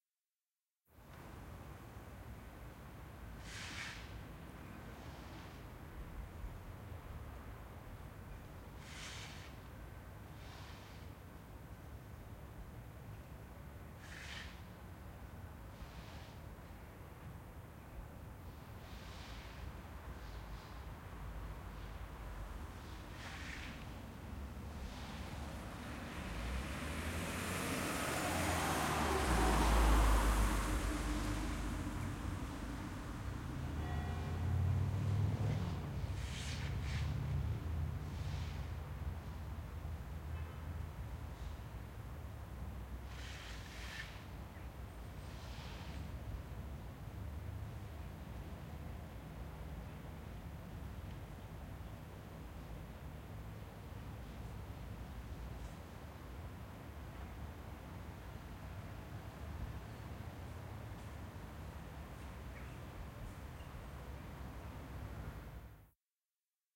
Zoom H4n X/Y stereo field-recording in Zeist, the Netherlands. General ambiance of park, residential.